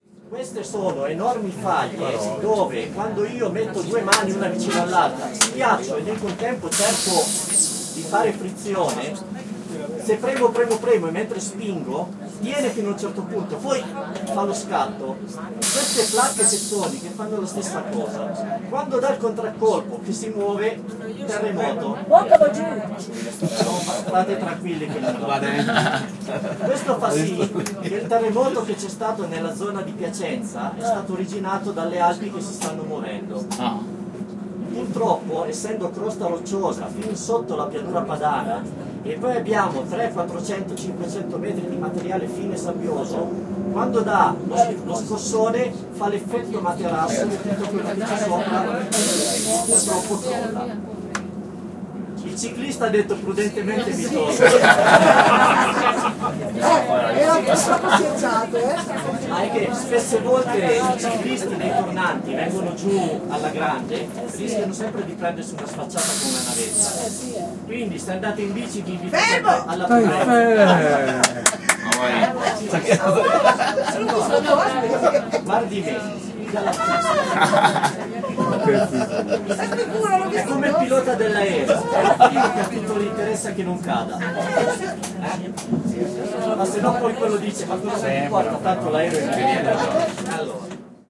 20160814 bus.guide.02
A guide talks in Italian during a vertiginous 'navetta' (shuttle bus) ride taking tourists from Serru Lake to Colle del Nivolet, in the Alps (Gran Paradiso National Park, Piedmont Region, Italy). PCM-M10 recorder with internal mics
road, Italian, bus, mountains, voice, field-recording, male, tour